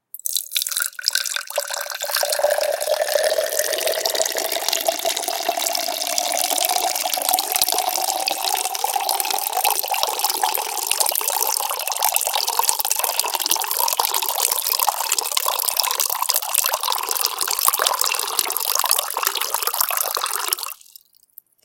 Pouring water 2
drip, liquid, Pouring, water